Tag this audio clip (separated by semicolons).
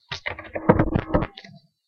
Blocked; Field-Recording; Microphone; Technology; White-Noise; Mic; Foley; Effect; FX; Public; Glitch; Abstract; School